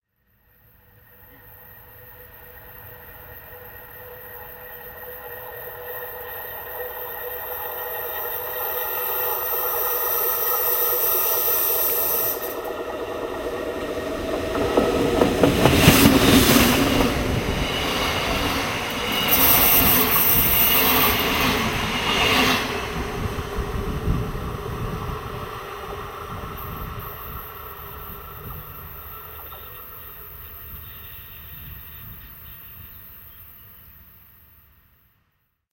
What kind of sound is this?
a train passes from left to right. the microphone is near the tracks. recorded with an sony fx1 camera.